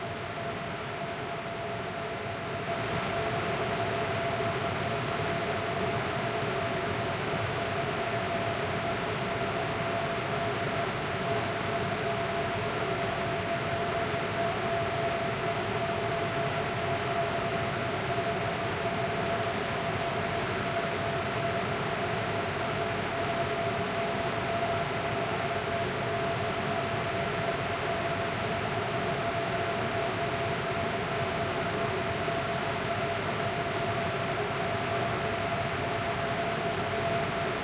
Ventillation ambience from Lillehammer Norway